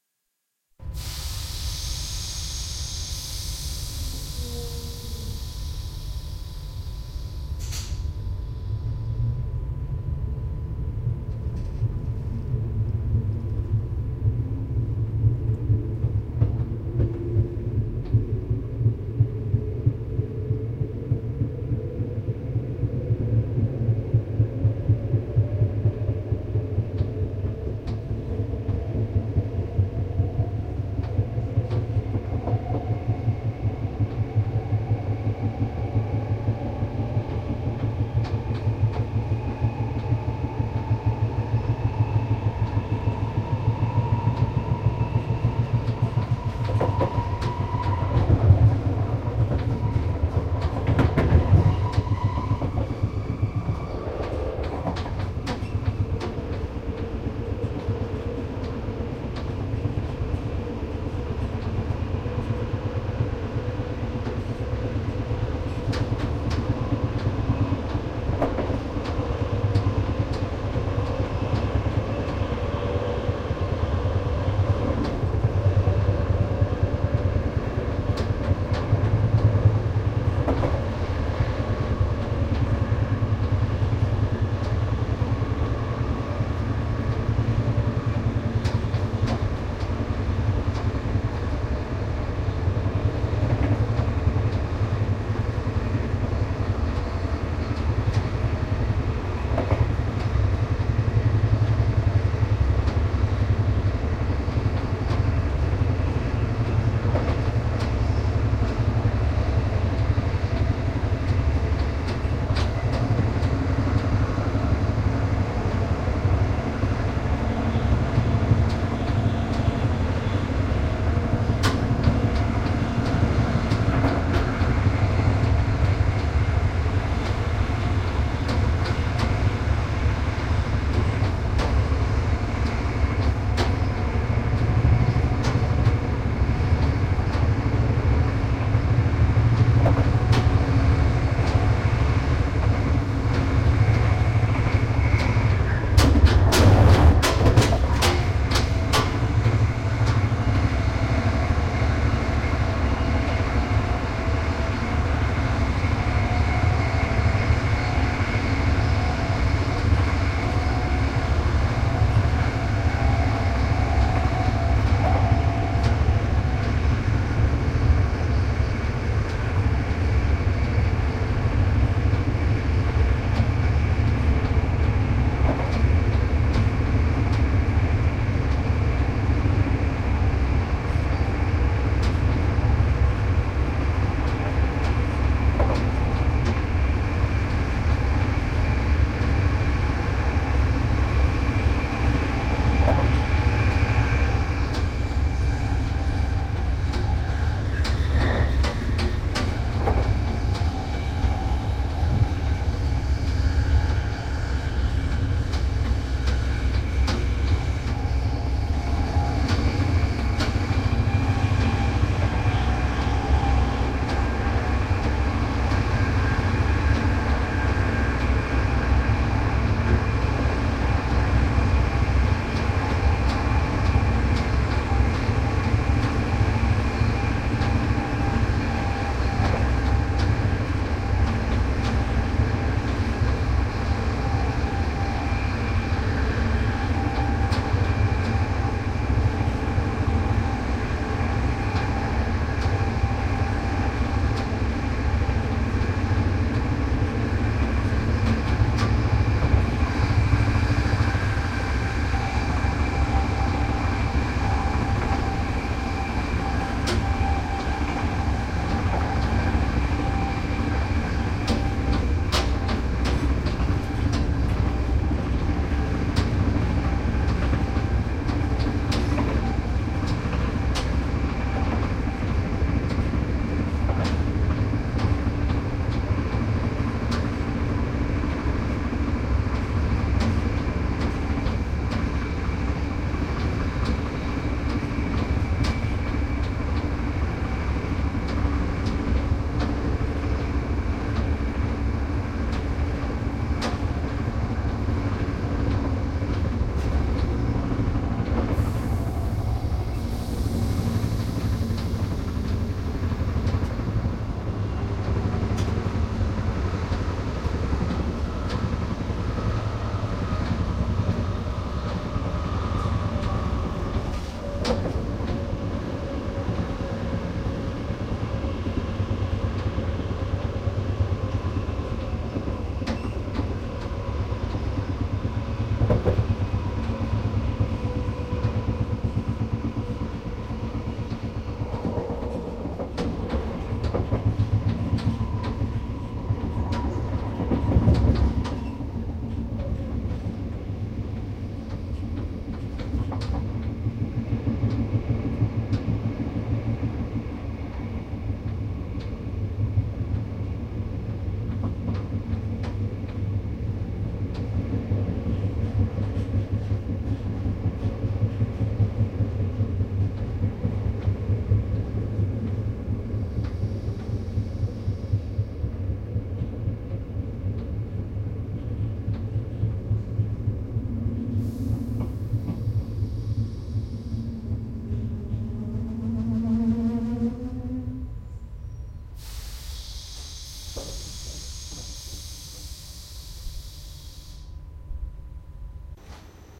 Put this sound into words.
Recording of trainsounds inside a Dutch electric train. Starting, cruising and stopping.